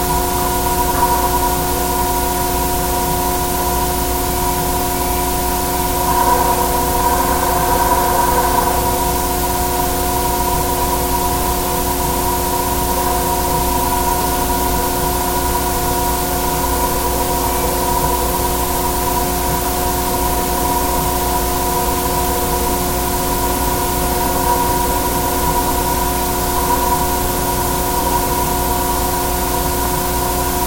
Denver Millennium Bridge 04
Contact mic recording of the Millennium Bridge in Denver, CO, USA, from the lower southeast stay fourth from the pylon. Recorded February 21, 2011 using a Sony PCM-D50 recorder with Schertler DYN-E-SET wired mic.
Colorado, contact-microphone, Denver, DYN-E-SET, field-recording, Millennium-Bridge, normalized, Schertler, Sony, wikiGong